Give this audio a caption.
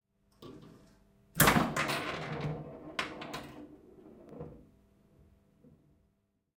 pinball-ball being launched by plunger
Ball being launched by the plunger of a 1977 Gottlieb Bronco Pinball machine. Recorded with two Neumann KM 184 in an XY stereo setup on a Zoom H2N using a Scarlett 18i20 preamp.